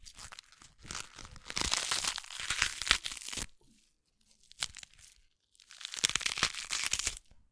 Content warning
Carne, hueso y tejidos desgarrándose
Broken Bone and Flesh